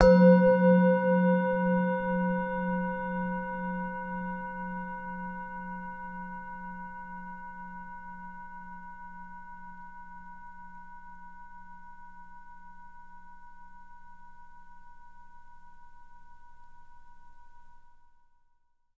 singing bowl - single strike 3
singing bowl
single strike with an soft mallet
Main Frequency's:
182Hz (F#3)
519Hz (C5)
967Hz (B5)
singing-bowl, record, Zoom-H4n, mic-90